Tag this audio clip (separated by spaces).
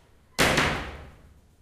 UPFCS12 laboratorys